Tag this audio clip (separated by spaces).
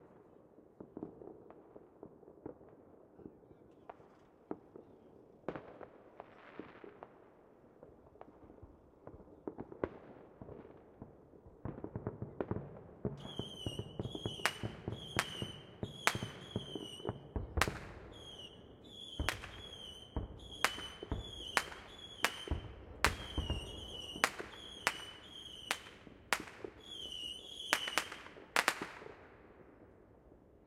fireworks new-years-eve pyrotechnic